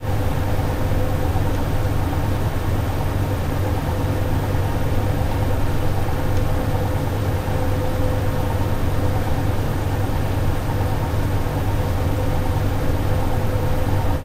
Clear airconditioner and desktop computer sound in my bedroom
Microphone: Rode NT1000
Preamp: ART DPSII
Soundcard: RME Hammerfall Multiface